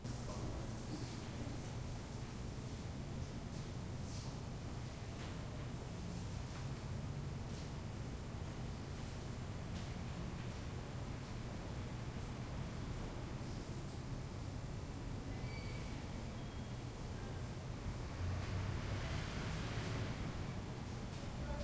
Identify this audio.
room tone quiet cafe light ventilation fridge empty some distant staff activity2
cafe, empty, light, quiet, room, tone